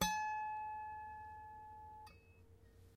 lap harp pluck